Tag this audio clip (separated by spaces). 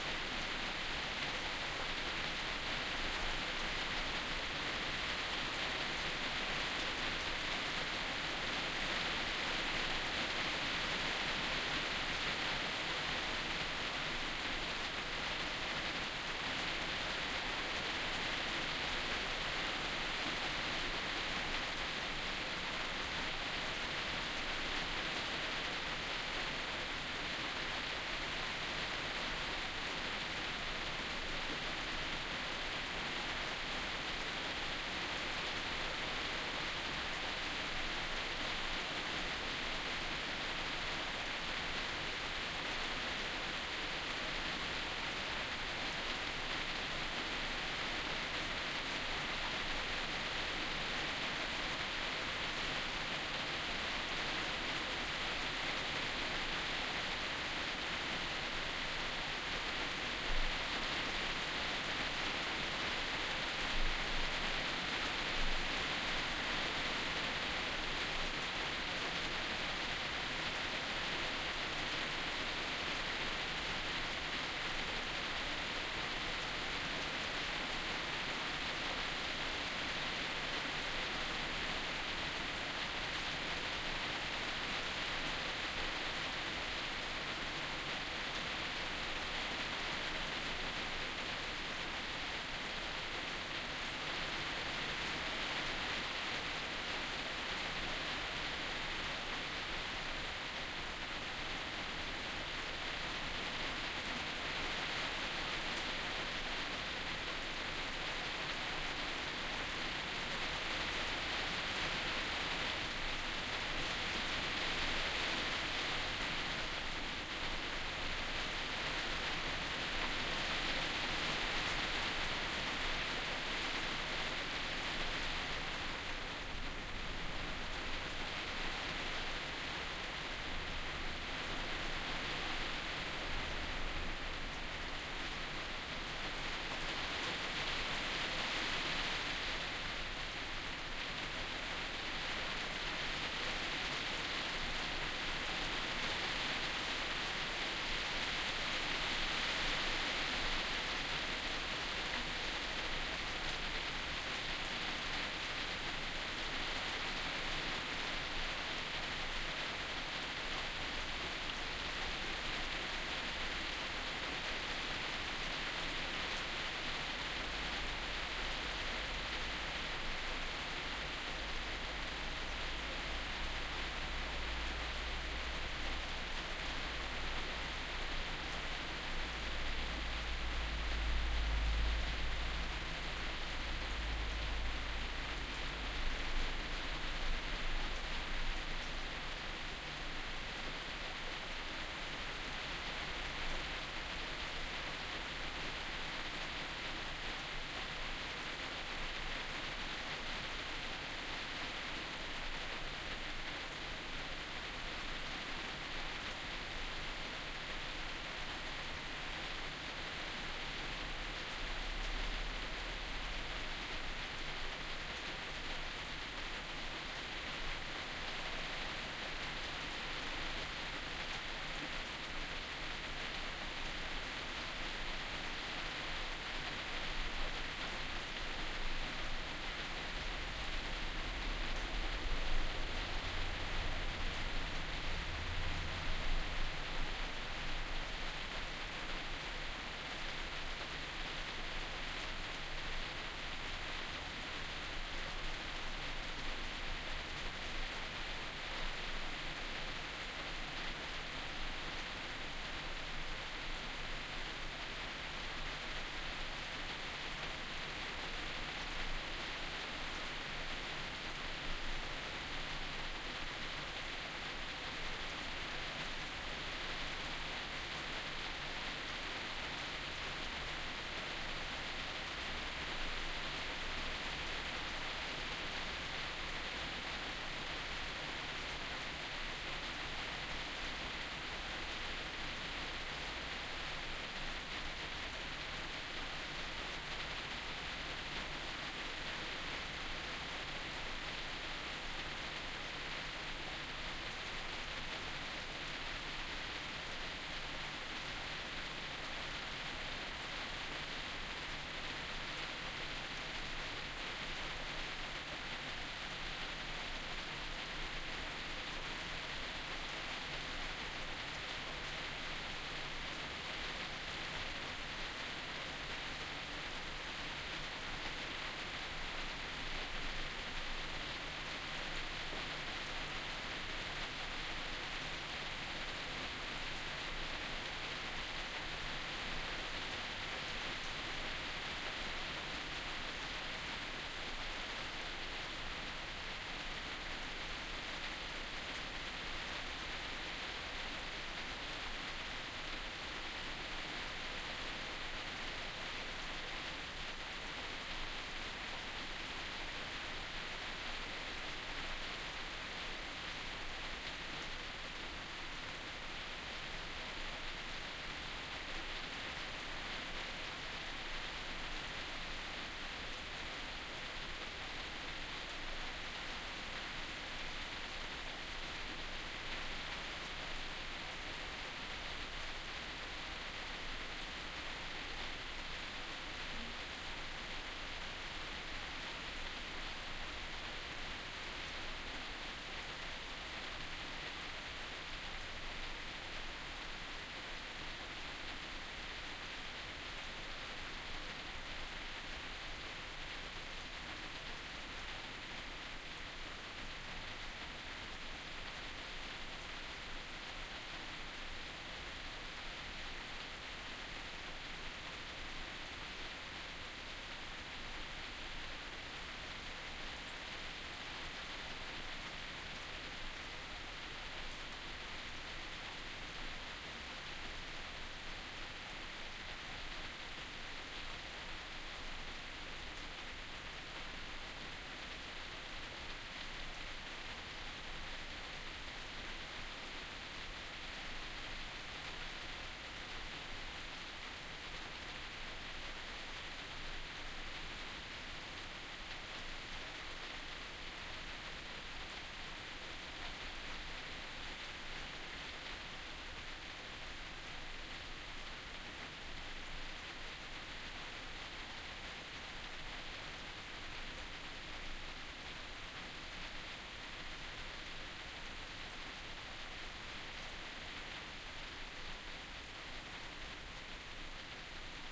drizzle field-recording rain water